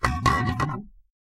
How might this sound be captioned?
plastic Thermos move on the floor
thermo, plastic, bottle, flask, thermos-flask